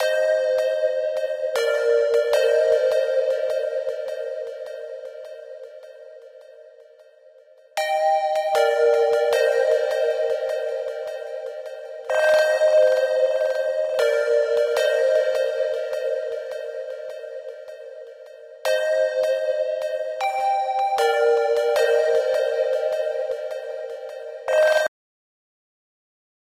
red blooded
Trance riff from my latest track